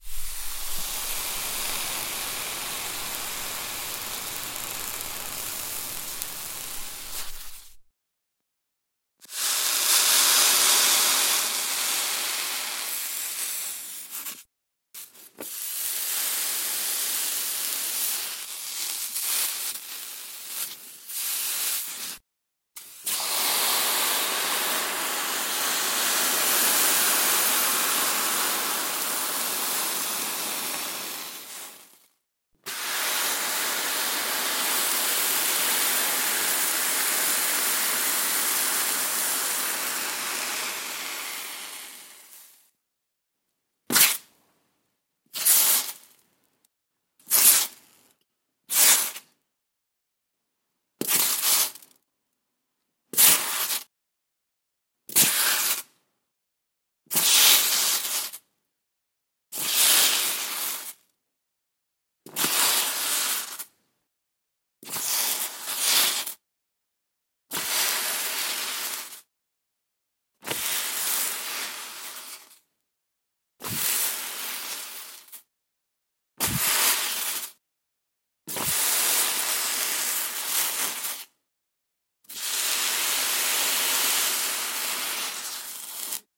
Throwing a wet tea-towel on top of a +200C electric hotplate causing it to instantly steam and hiss. The recording has been cleaned and normalized.
burn, burning, crackle, fire, hiss, smoke, spark, steam, texture